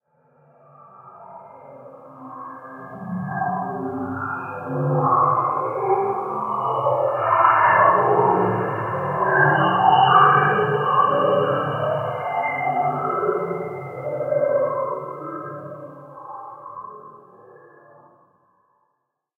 This sound was created by taking a recording of a bath emptying, slowing it down and running it through several passes of aggressive noise reduction. Processed in Cool Edit Pro.
noise-reduction, underwater